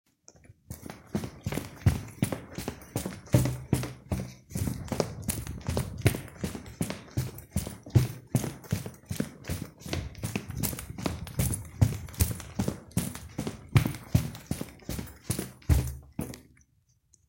Running on concrete, indoors
Running - Concrete floor